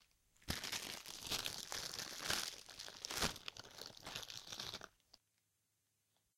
bag rustle
This is a sound of a hand grabbing somethig out of a little plastic bag
sweets, candy, bag-rustle, bag, rustle